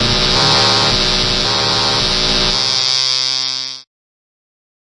Alien Alarm: 110 BPM C2 note, strange sounding alarm. Absynth 5 sampled into Ableton, compression using PSP Compressor2 and PSP Warmer. Random presets, and very little other effects used, mostly so this sample can be re-sampled. Crazy sounds.
110, acid, atmospheric, bounce, bpm, club, dance, dark, effect, electro, electronic, glitch, glitch-hop, hardcore, house, noise, pad, porn-core, processed, rave, resonance, sci-fi, sound, synth, synthesizer, techno, trance